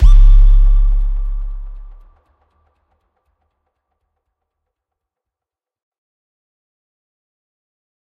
SD Low+Hi 07
Powerful sound of impact.
impact, sub, hit